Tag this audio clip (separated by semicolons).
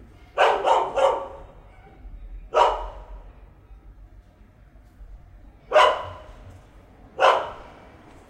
animals; bark; dog